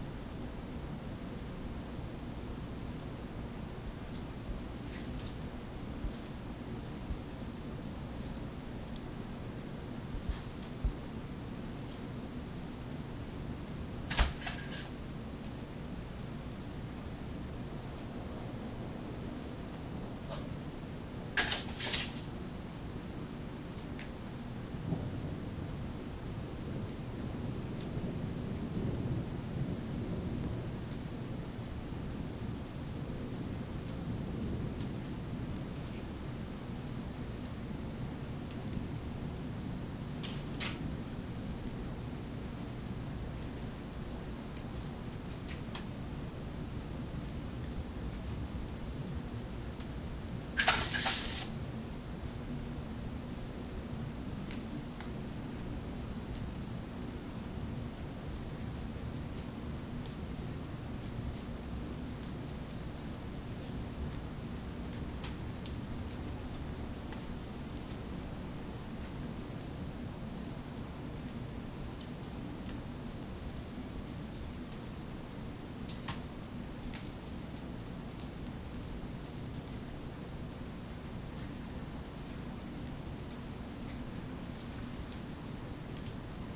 While trying to capture the sound of a frog in an approaching storm with a broken solder point in the microphone and a dog jumping at the sliding glass door, a bird landed about a foot away from me while I was standing still with the DS-40 in hand. You can barely hear him ruffling his feathers and flying away...